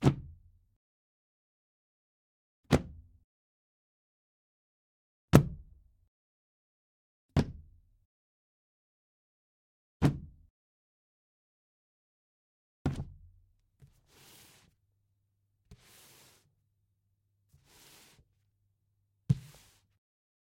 phone book drop on table and push
Dropping a phone book on a table and giving it a little push.
Recorded with H5 Zoom with NTG-3 mic.
Please note: No phone books were harmed in the making of the audio (sorry about the trees).
phone, white, push, drag, yellow, pages, book, drop, table